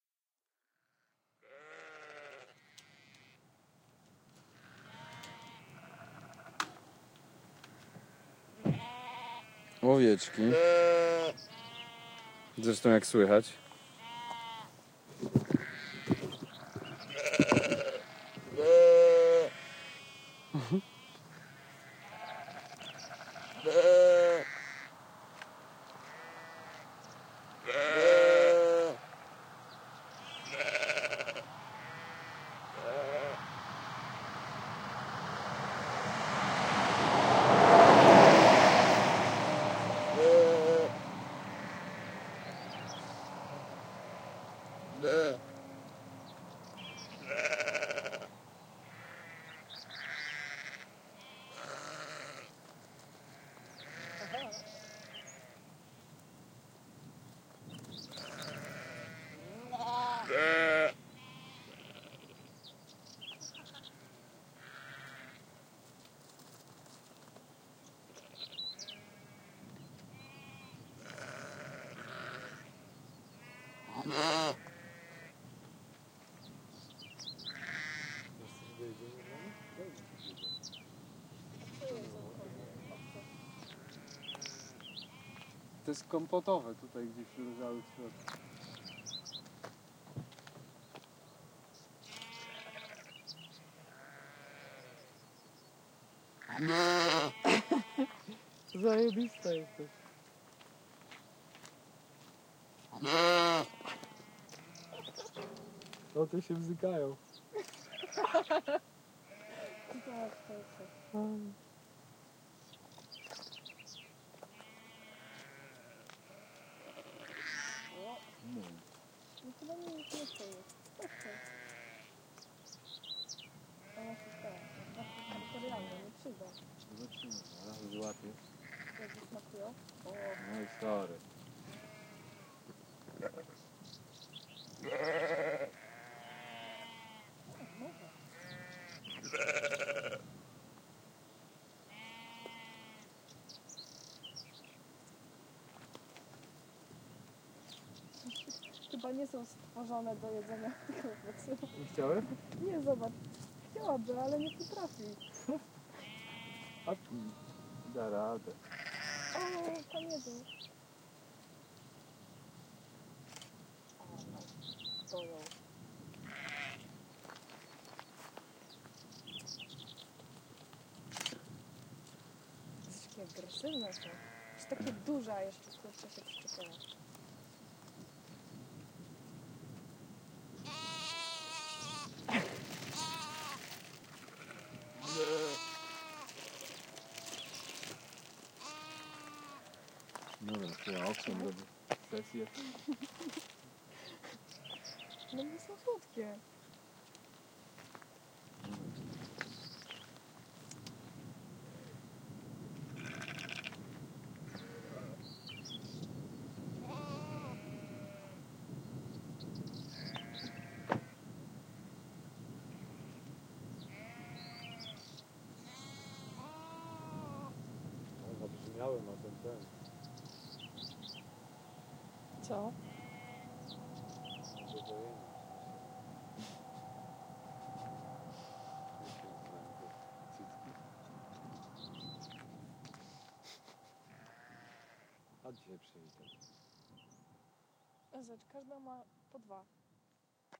Sheep Norway RF
Field recording of Sheep, unedited, Edirol R09, u can hear as enjoying this funny company of sheep.